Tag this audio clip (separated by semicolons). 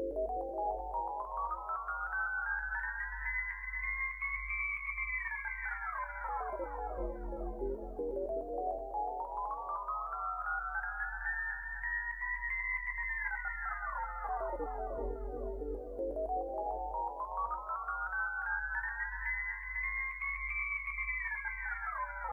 cosmos; space; synthesized; synthesis; espacial; massive